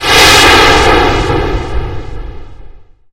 air suddenly decompressing on a spaceship